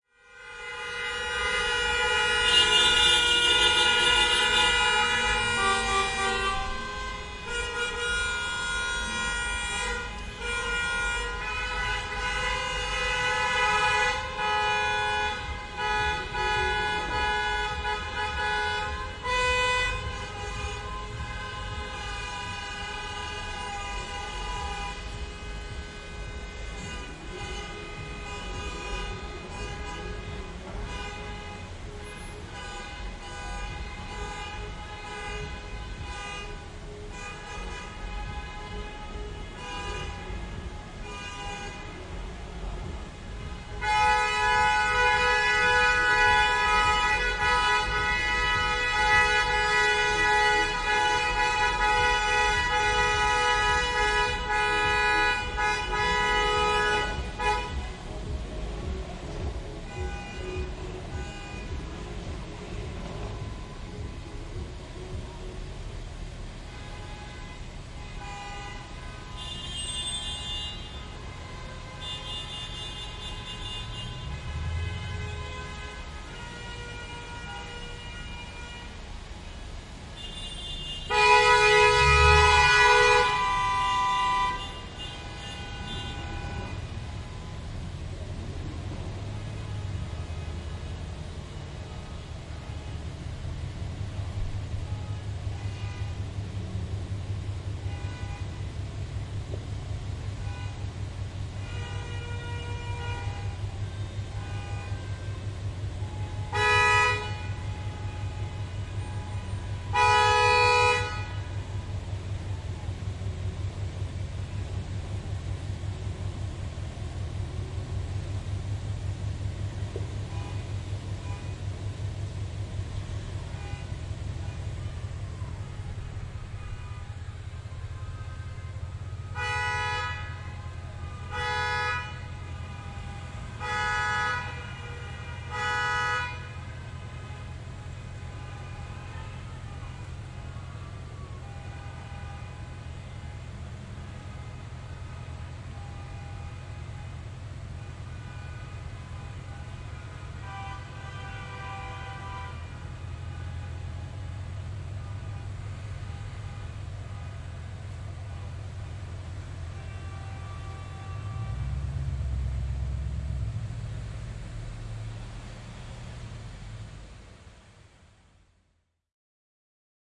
A nice day for a turkish wedding
Honking cars pass through the street, celebrating a Turkish wedding. Recorded through a roof window from the second floor of a house. Recorded with a Zoom H6 with the XYH-6 Capsule. Recorded october 7th 2017. No montage, just a fade in and fade out and a little equalizing done in reaper.
city, street, urban, engines, traffic, noise, horns, cars, field-recording, street-noise